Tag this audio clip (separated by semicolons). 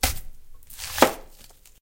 onion; slicing; food; kitchen; diner; cooking; cutting; cut; vegetables; knife